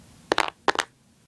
wood impact 06
A series of sounds made by dropping small pieces of wood.